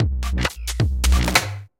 Dark glitchy Dubstep Loop 3 (133bpm)
This is a Burial-like dubstep loop.